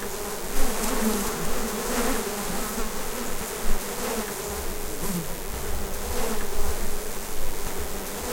This is a recording (slightly fiddled with)I made of the many flys attracted by a large patch of flowering mint here in Norfolk UK
Ive cut it so you can loop it!
Hearing is seeing